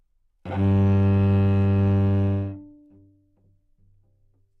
Part of the Good-sounds dataset of monophonic instrumental sounds.
instrument::cello
note::G
octave::2
midi note::31
good-sounds-id::4272